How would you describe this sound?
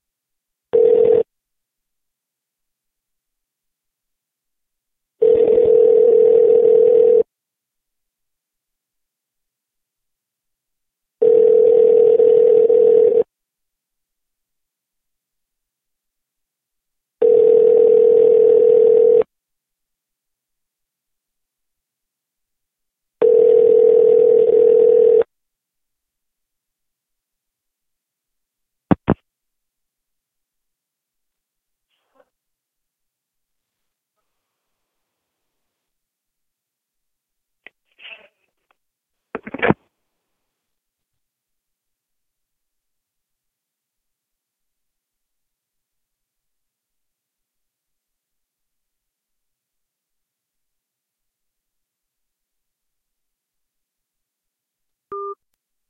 Phone rings, call receiver picks up phone, some silence, hang up phone.
calling, call, house, ring, line, telephone, land, land-line, phone, ringing, click